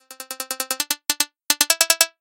LAABI Rami 2014 2015 gameVictory
HOW I DID IT?
Generate 9 Plucks (60 pluck Midi Pitch, 0.05 seconds duration),a 0.10 second silence, 2 plucks (62 pluck Midi Pitch, 0.05s) a 0.20 second silence, 2 plucks (62 pluck Midi pitch, 0.05) and 4 plucks (60 pluck midi pitch, 0.05s)
Apply effects: click supression, progressive variation of pitch (40% increase in tempo) and acute sound augmentation (+2db)
HOW CAN I DESCRIBE IT? (French)----------------------
// Typologie (Cf. Pierre Schaeffer) :
V" (Itération variée)
// Morphologie (Cf. Pierre Schaeffer) :
1- Masse:
- Suite de sons toniques.
2- Timbre harmonique:
Son éclatant
3- Grain:
Son lisse, grain fin.
4- Allure:
Absence de vibrato
5- Dynamique :
Attaque abrupte
6- Profil mélodique:
Variations scalaires
7- Profil de masse
Site :
Strate unique. Son quasiment à la même hauteur.
Calibre :
Aucun filtrage, aucune équalisation.
8bit, game, level, next, stage, video, win